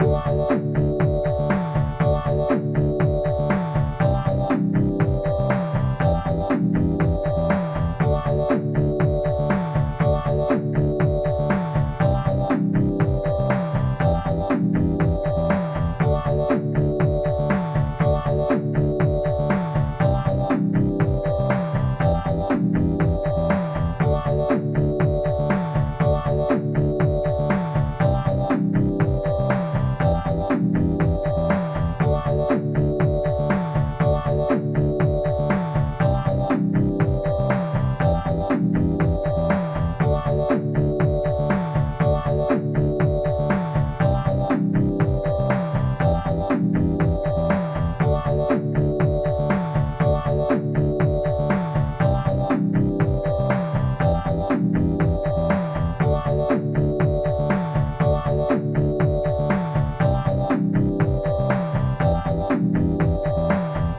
8 bit game loop 008 simple mix 1 long 120 bpm
120, 8, 8-bit, 8bit, 8-bits, bass, beat, bit, bpm, drum, electro, electronic, free, game, gameboy, gameloop, gamemusic, loop, loops, mario, music, nintendo, sega, synth